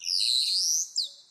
single Starling call recorded at Jardines de Murillo, Seville., with traffic noise filtered out
birds, field-recording, streetnoise